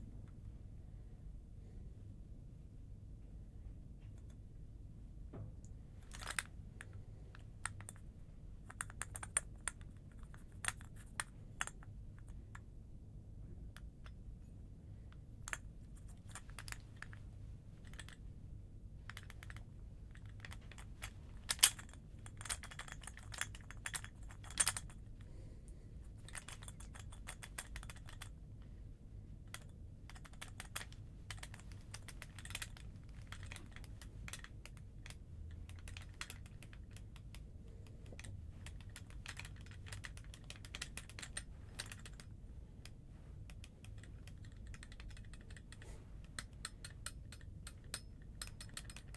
Metal Gun Shaking Sound effect fun